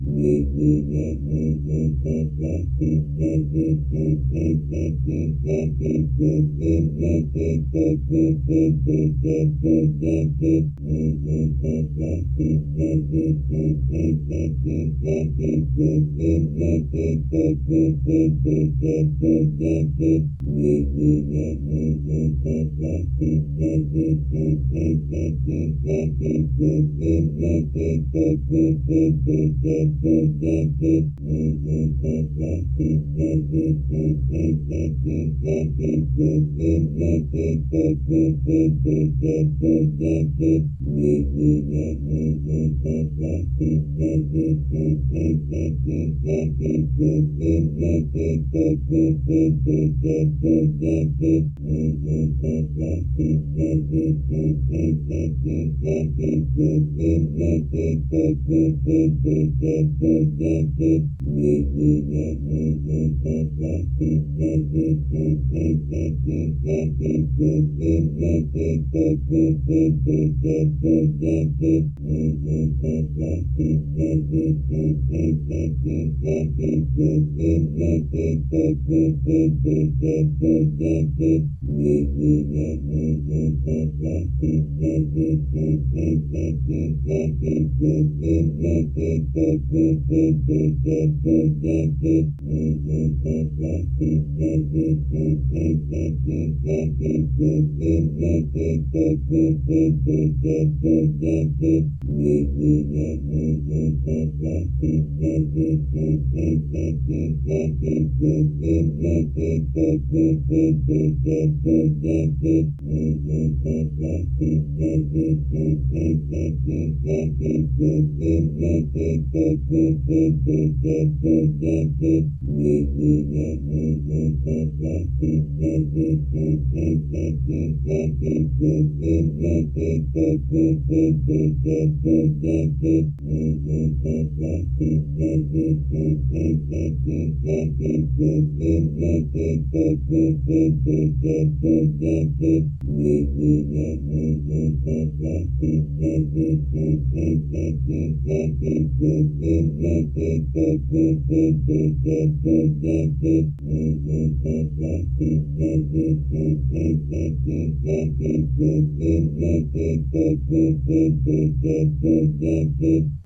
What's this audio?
Humming Magical Orb
Magical Humming Ambiance.
background-noise, dnd, dragons, dungeons, dungeonsanddragons, hum, humming, magic, opening, orb, portal, spell, warp, warped